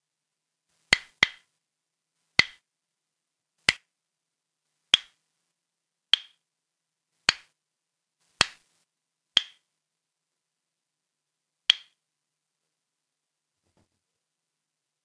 Various knock with 2 pieces of wood. Suitable for minimal percussion. One knock needs to be isolated.
dry, minimal, percussion, wood